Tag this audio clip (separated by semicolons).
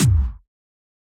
bd; kicks; layered; bass-drum; low; bassdrum; synthetic; floor; processed; hard; bass; kickdrum; deep; drum; kick; bassd